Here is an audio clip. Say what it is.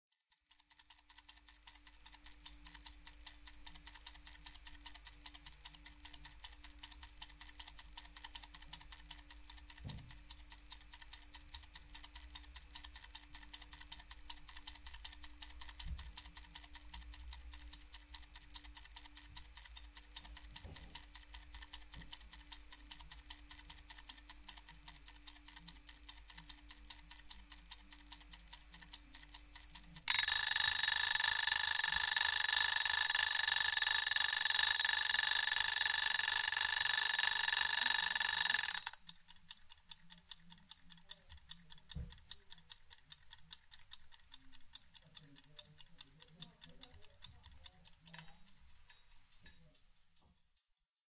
Kitchen cooking timer